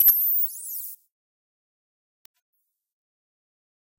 high frequencies C4
This sample is part of the "K5005 multisample 20 high frequencies"
sample pack. It is a multisample to import into your favorite sampler.
It is a very experimental sound with mainly high frequencies, very
weird. In the sample pack there are 16 samples evenly spread across 5
octaves (C1 till C6). The note in the sample name (C, E or G#) does
indicate the pitch of the sound. The sound was created with the K5005
ensemble from the user library of Reaktor. After that normalizing and fades were applied within Cubase SX.
experimental, weird